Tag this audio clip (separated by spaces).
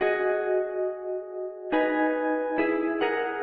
chill mysterious soft